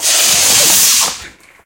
firework twister
bang, boom, destroy, explosion, firework, fire-works, fireworks, long, wide